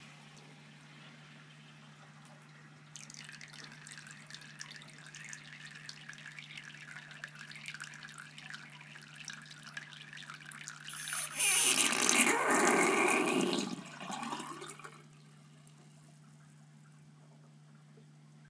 Water trickling into a basin and then going down the drain. Includes 60-cycle hum that pervades a house. Recorded with a mini-DV camcorder with an external Sennheiser MKE 300 directional electret condenser mic.